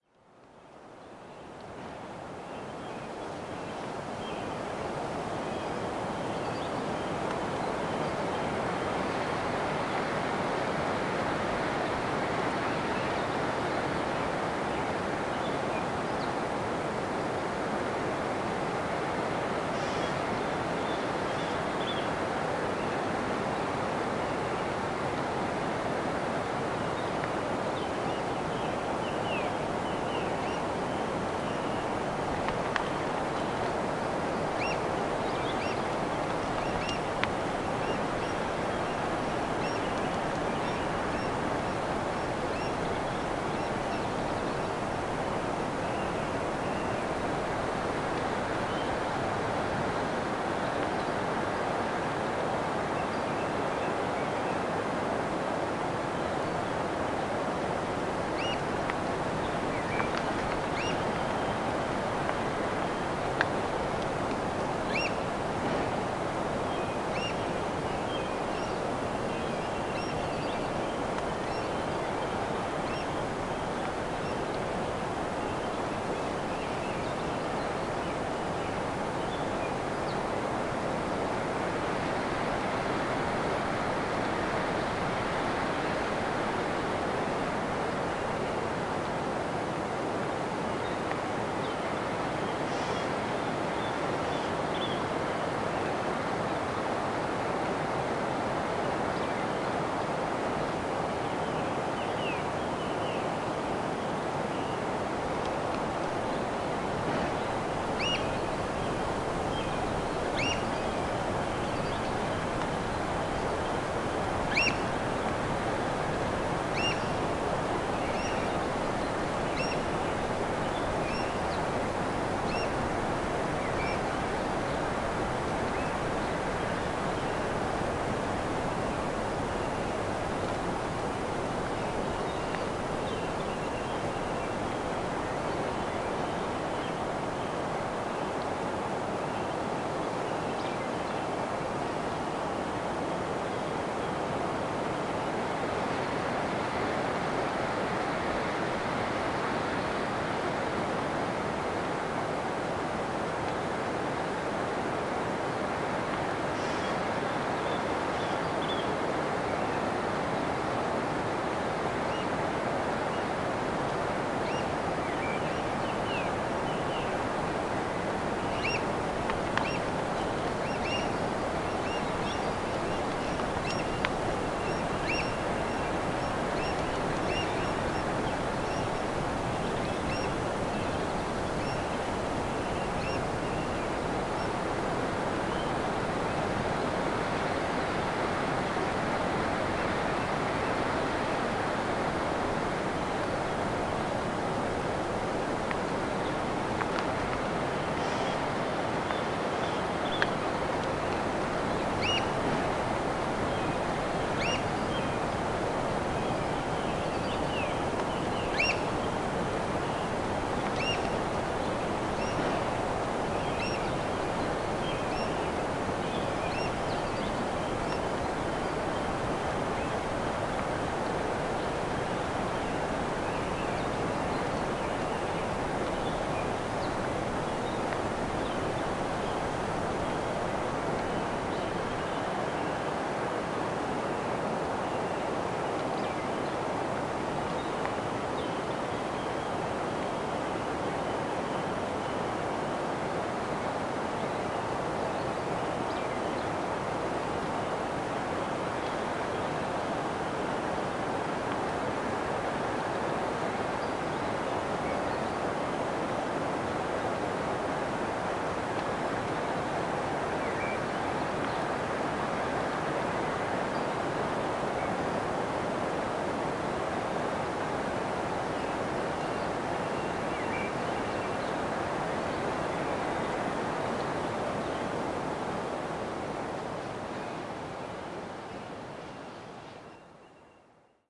Cumberland-Oystercatchers
Oystercatchers flying over the campsite at dawn.